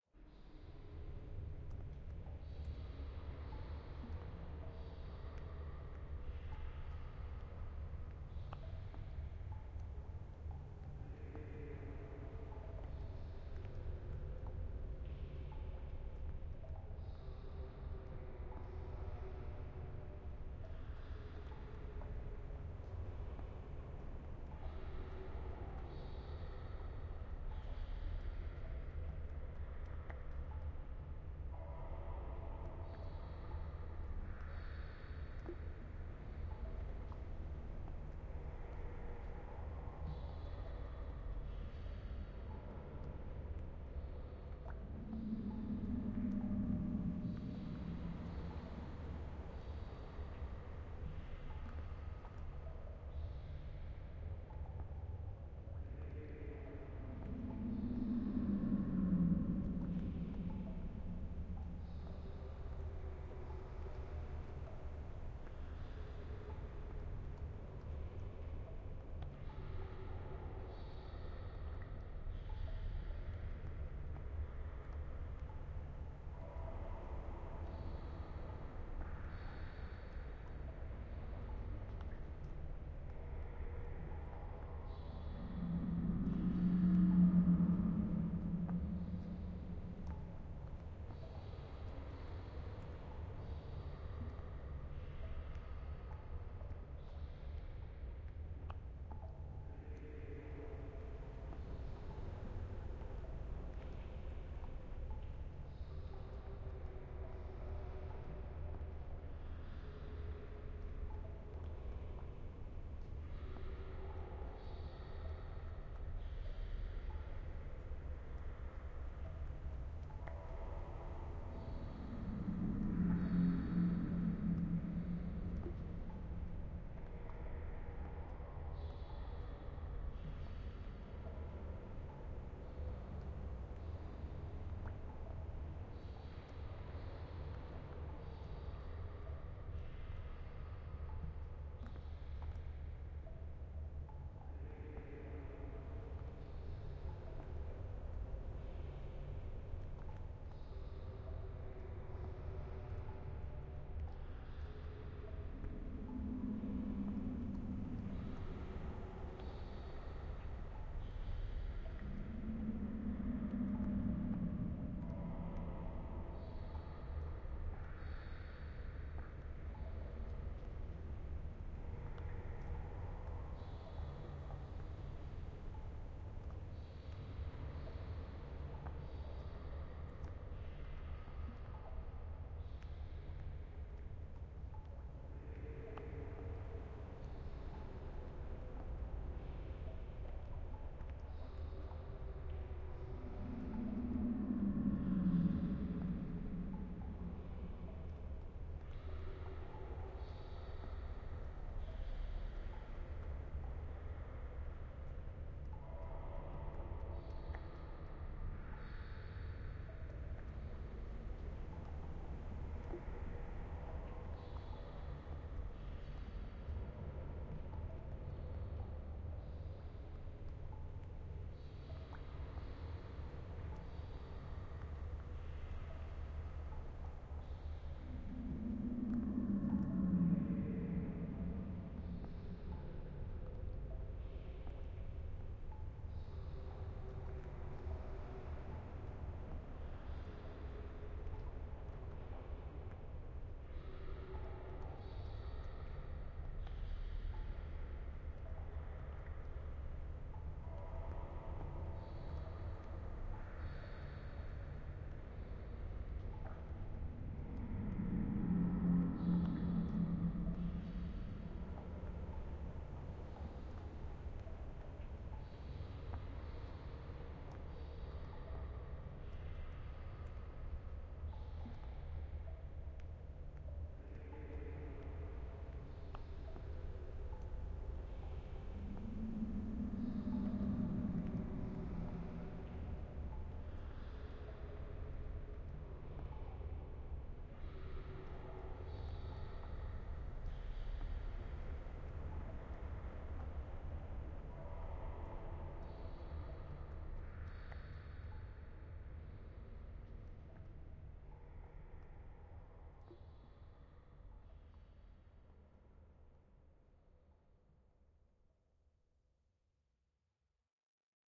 Atmosphere created in Pro Tools HD10 for theater play "Indignadores Museum" directed by Diego Bergier.
Thank you Lustmord